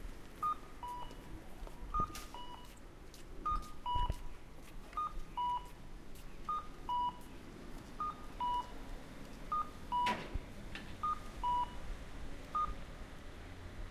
Pedestrian Crossing Japan
Pedestrian Crossing, recorded near Fushimi Inari, Kyoto, Japan.
Recorded with a Zoom H1.
Crossing, Japan, Pedestrian